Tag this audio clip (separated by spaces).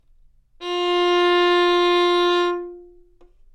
good-sounds neumann-U87 violin